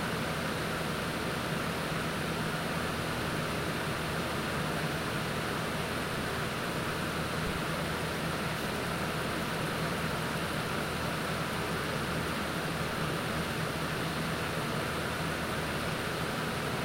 A big fan humming.
air-conditioning, ambiance, ambience, ambient, atmosphere, background, buzz, drone, fan, hum, machine, noise, vent